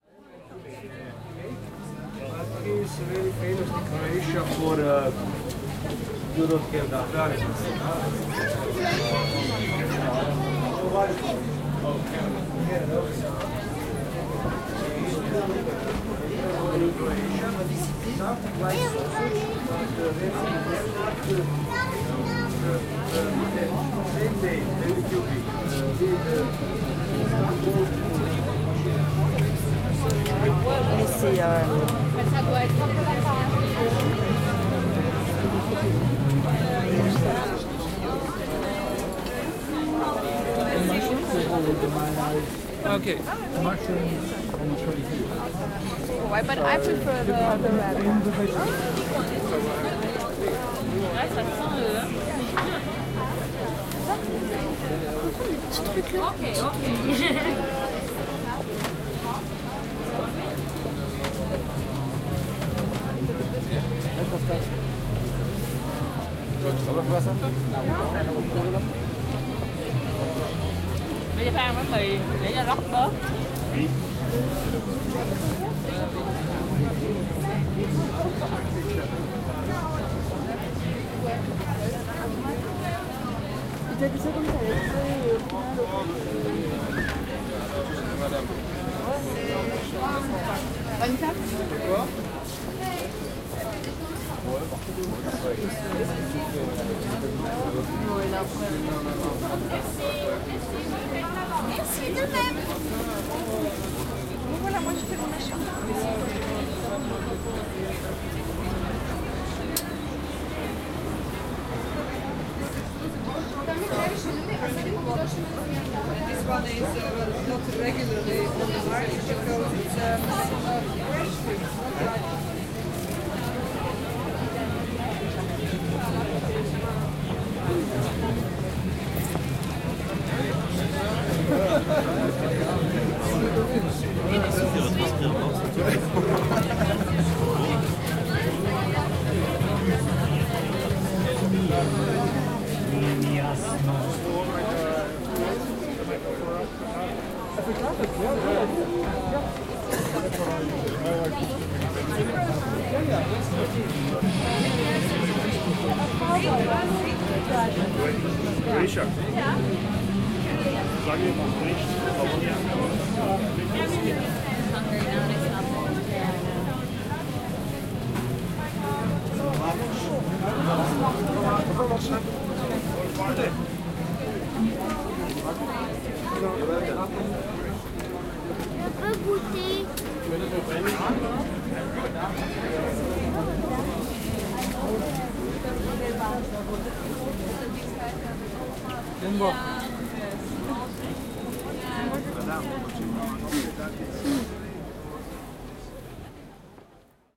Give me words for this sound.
The ambiance of the famous Strasbourg christmas market, known as one of the oldest in the world (first edition took place in 1570) and the city's biggest touristic event, gathering thousands of people downtown for one month at the end of every year. I took my zoom h2n in different places, capturing a slightly different mood each time. expect lots of crowd sounds, background music, street atmosphere and... a lot of different languages (french, german, spanish, english.... All recordings made in MS stereo mode (120° setting).